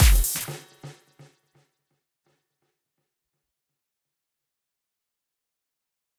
The sound are being made with VST Morphine,Synplant,Massive and toxic biohazzard.
techno, dance, house, club, trance, Glubgroove, samples